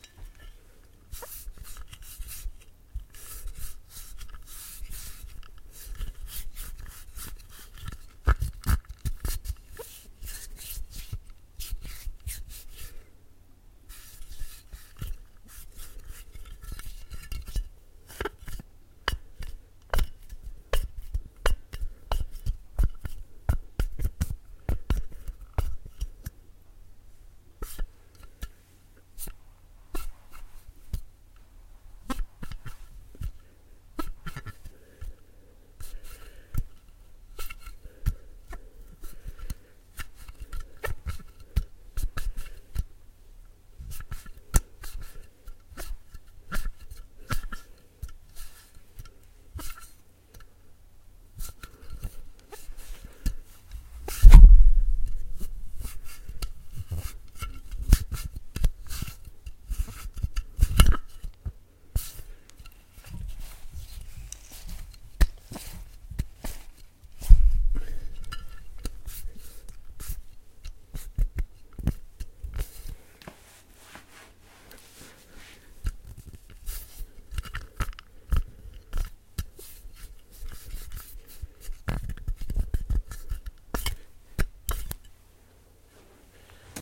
Glass Squeak!
Sliding my hands across a glass jar to make faint squeaking glass sounds. Recorded using an AKG Perception 120 in my home studio.
glass, slip